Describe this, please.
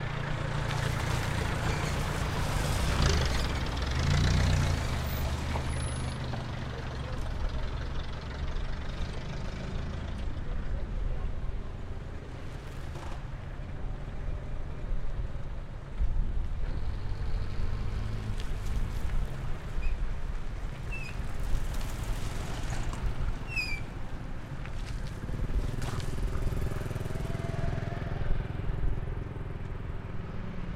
Motor Truck
ambience, car, cars, day, h4, motor, street, truck